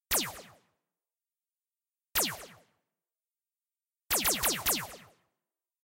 Small slower beams
Have fun!
laser, sci-fi